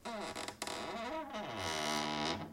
Opening and closing a cupboard door with the mic focused on the wood rather than the hinge.